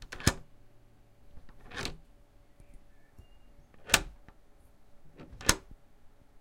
A small interior door being locked and unlocked.